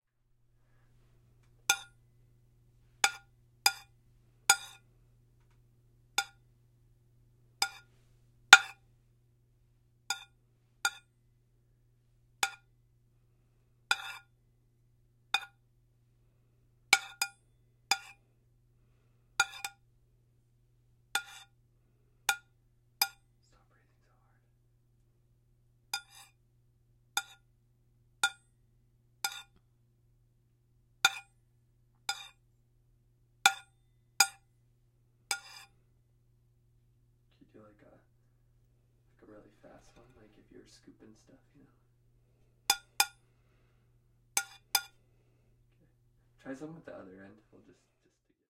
Fork on Plate
Fork or silverware scraping against a metal plate.
kitchen, hit, scrape, Fork, plate, silverware, cutlery, knife, dishes, spoon, glass, metal